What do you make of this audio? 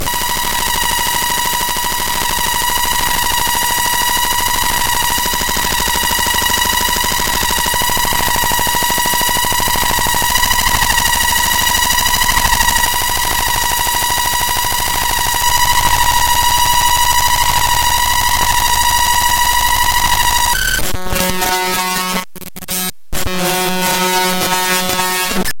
Raw Data - Pulse Modulator
Seperated In SOme PROgrAm
and its somehow a song but taken and diced into 1 second...